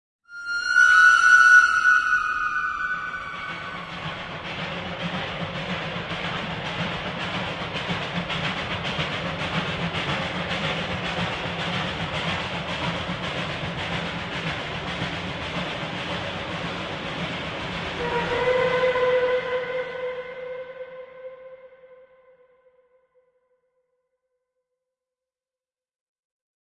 SFX6 WhistleScreemTrain
A sound effect I created for use in a recent production of Carrie's War
train, whistle, sound-effect, steam-train, scream